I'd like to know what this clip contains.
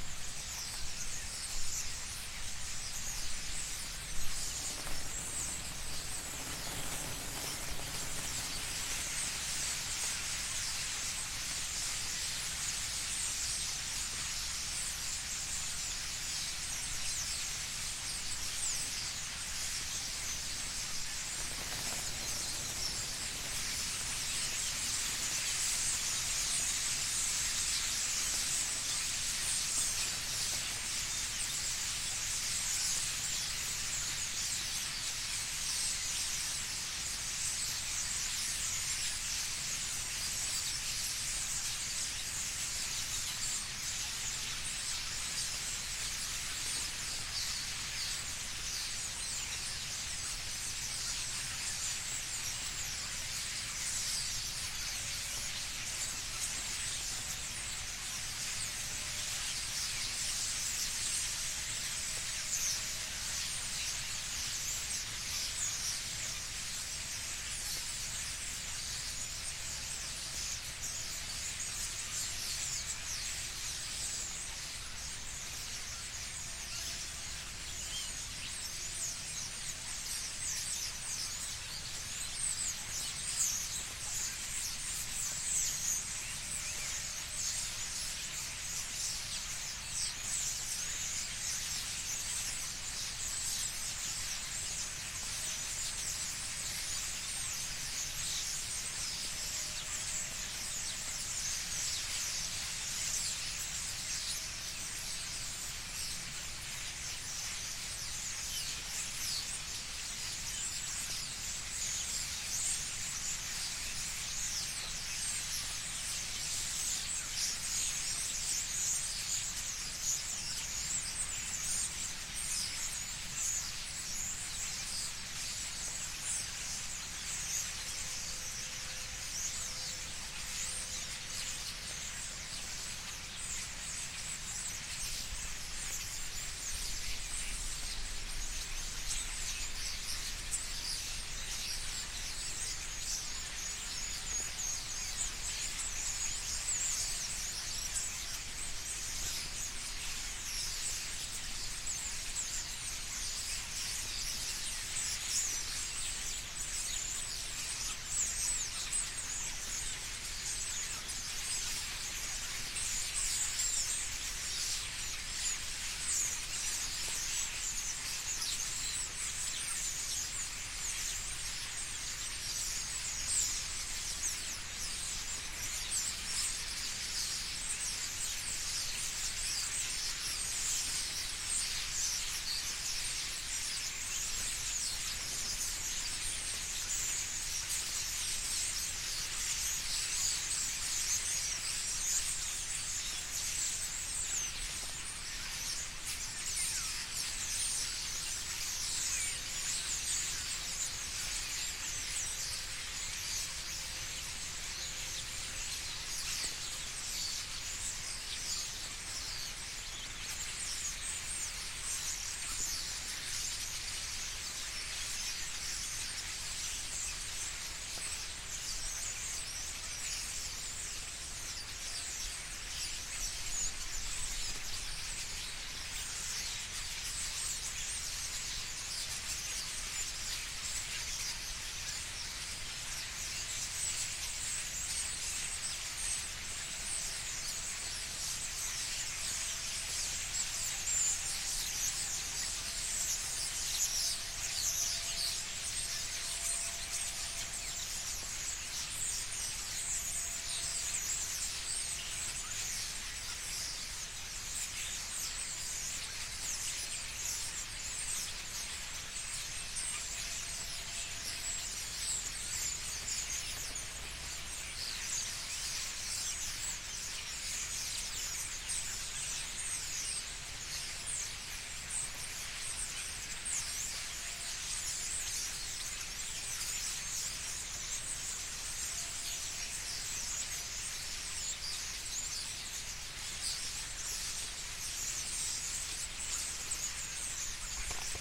Birds nests in a french town, (Joué-lès-Tours)
recorded with zoom H4n at night, in november 2015.
birds, nest, squawking